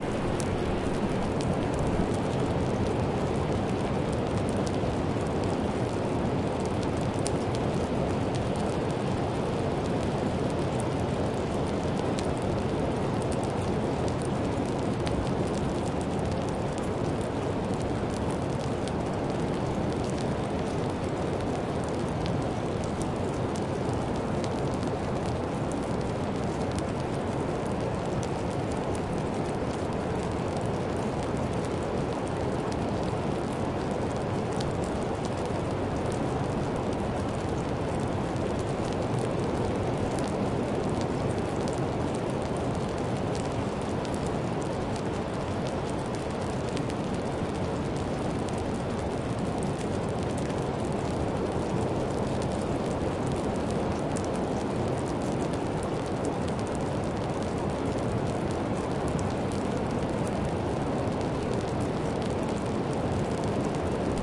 Ruby Beach on the Pacific Ocean, Olympic National Park, 20 August 2005, 7:42pm, standing with back to small cave facing ocean, recording little drips over the front of the cave